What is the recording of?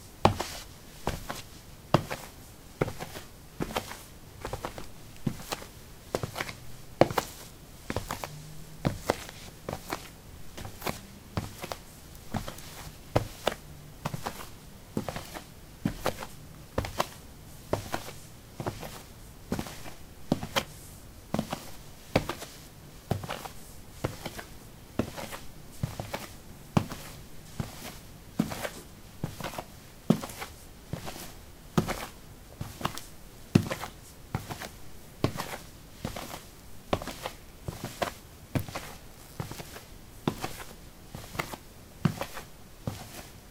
soil 11a sneakers walk
Walking on soil: sneakers. Recorded with a ZOOM H2 in a basement of a house: a wooden container placed on a carpet filled with soil. Normalized with Audacity.
walk, footstep, footsteps, steps, walking, step